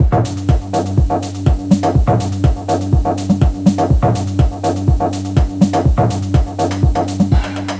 Groove Four
Loops made from ambient sounds of Tecnocampus University.
RodeNT3, Tecnocampus, tfg, ZoomH4n